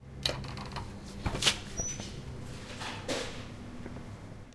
main door tv studio
This sound is produced by the opening and closing of a door situated very near from TV studio in Tanger building.
Sound ambience from hall is perceived.
Studio, TV, UPF-CS12, campus-upf, closing, door, opening